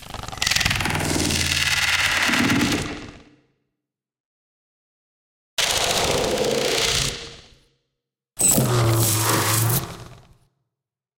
Alien/Robot Sounds
Made with sylenth 1, loads of effects and some foley stuff.
Abstract, Alien, Electric, Glitch, Robot, robotic, Sci-fi, Sound, Sound-Design, space, Synthesizer, Technology, Weird